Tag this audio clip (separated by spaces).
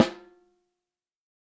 13x3 atm250 audio drum multi pearl piccolo sample snare steel technica velocity